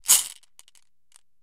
marbles - 15cm ceramic bowl - shaking bowl full - ~13mm marbles 02
Shaking a 15cm diameter ceramic bowl full of approximately 13mm diameter glass marbles.